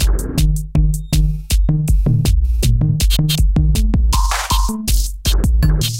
Drumloops and Noise Candy. For the Nose
rythms, drums, experimental, sliced, breakbeat, electronica, extreme, processed, glitch, electro, hardcore, idm, drumloops, acid